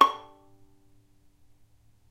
violin pizz non vib C#5
violin pizzicato "non vibrato"
pizzicato, violin